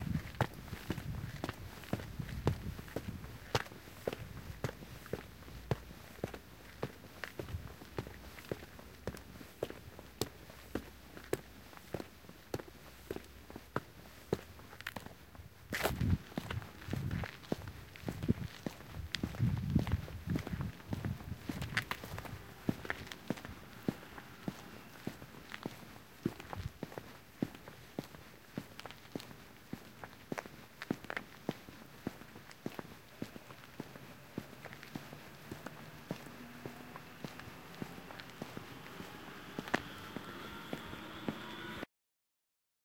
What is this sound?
footsteps on surfaced road in boots